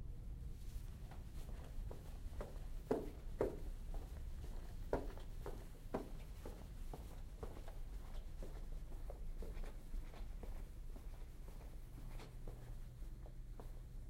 Footsteps in the Hallway 3
One of the recordings of me walking down the hallway in the school. Listening back to the recording, I can't tell which part of the school it was, but I'm fairly sure it was when I walked by the windows. There's really only the sound of air conditioning. The surface I was walking on was carpet, it was walked on with shoes.